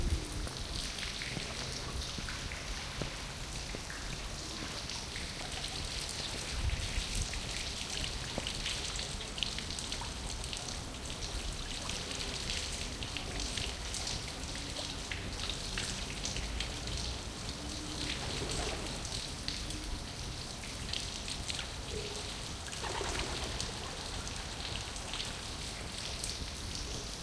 A fountain in the cloisters garden of a cathedral - longer recording. I was walking around the fountain but forgot to keep my head still and watched the pigeons! Binaural recording on a Zoom H1.